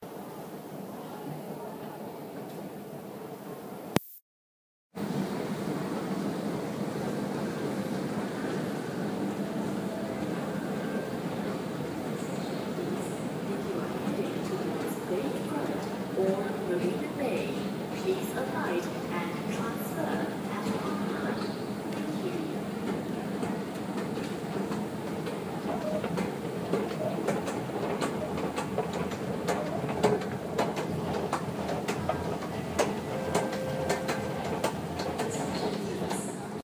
Singapore MRT Escalator Announcement
escalator, field-recording, metro, mrt, singapore, smrt, station, subway, train, tube, underground